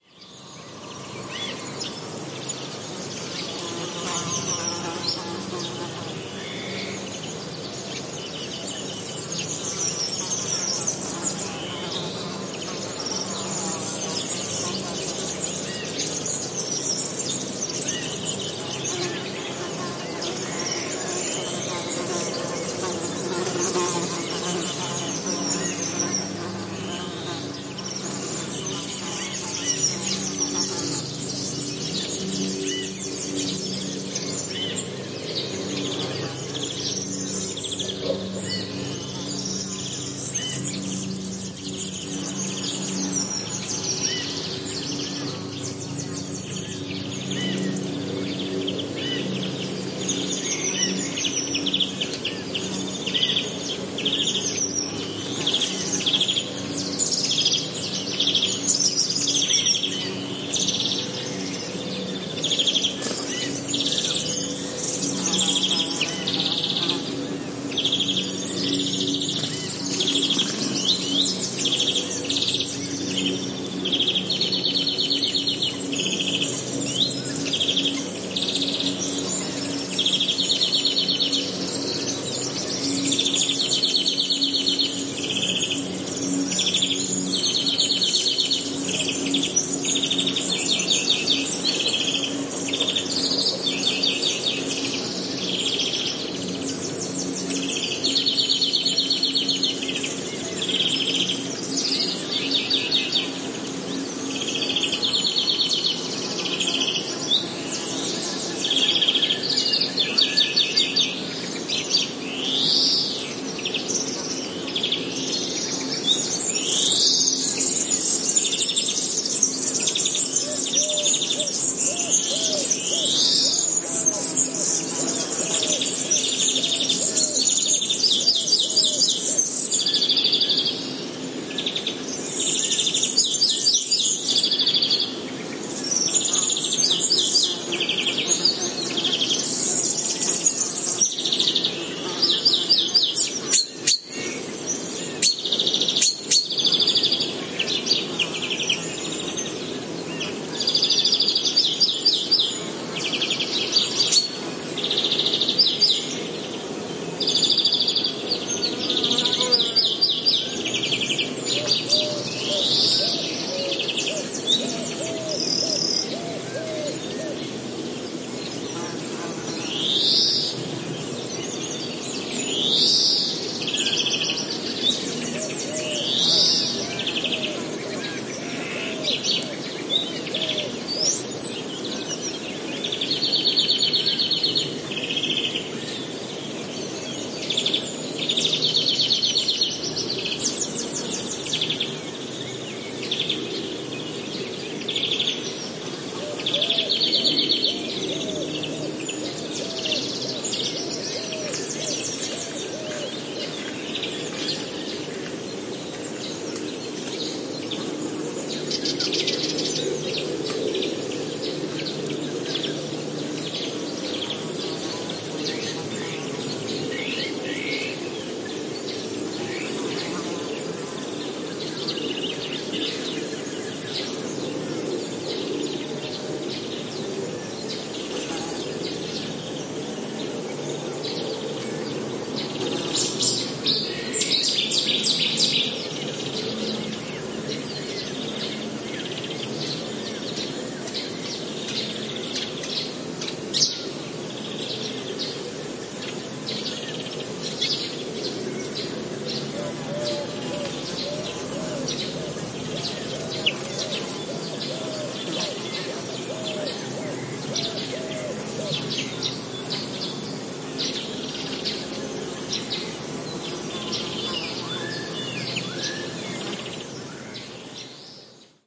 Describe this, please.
20180322.Clippy.XLR.pair.109
Forest ambiance with insects, lots of birds singing (Warbler, Serin, Blackbird, Cuckoo, Azure-winged Magpie, among others) and an airplane passing high. Clippy XLR EM172 Microphone Matched Stereo Pair (manufactured by FEL Communications Ltd) into Sound Devices Mixpre-3. Recorded near Hinojos (Huelva Province, S Spain). Traffic noise from a road 4 km away is perceptible
gear, spring, nature, forest